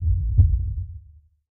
Stress Heart Beat
This is a heart beat that I have created and edited out of my voice.
anxiety, heart, heart-beat, heartbeat, stethoscope, stress